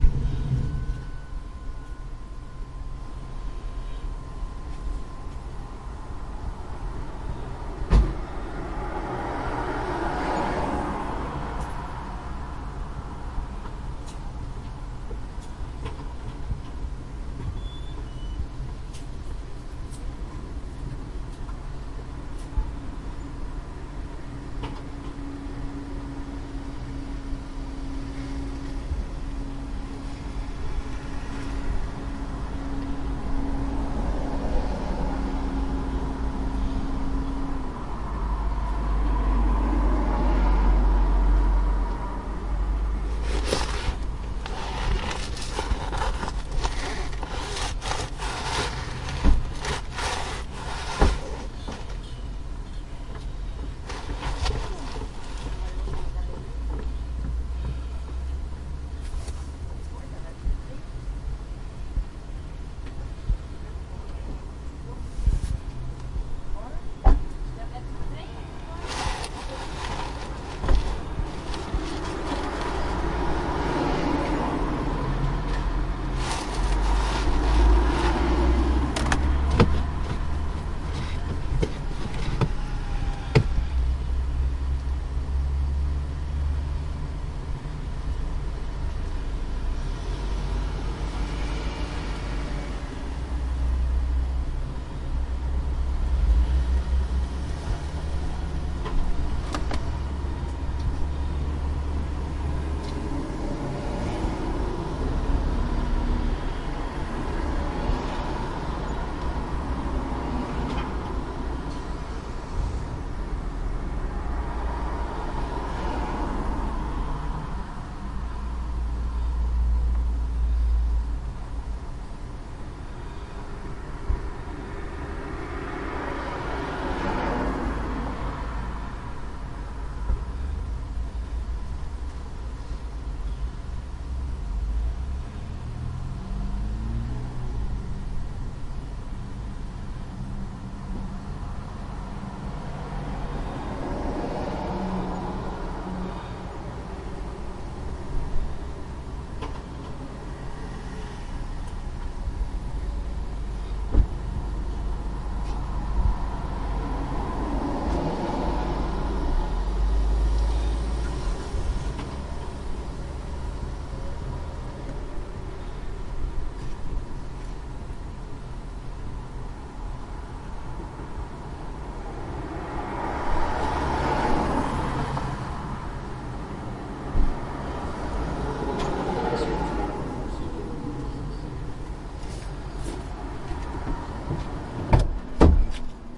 field recording in town 01
This is the first field recording i have done with the zoom h1 handy recoorder while waiting in the car in town there lots of sound of car passing by and other noise from the inside of the car that can be heard in the 3 minute recording
ambiance, car-passing-by, city, car-sound, noise, people, field-recording, summer